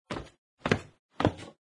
Three brisk steps on a hardwood floor
Original recording: "Man Walking Stairs" by 14GPanskaHonc_Petr, cc-0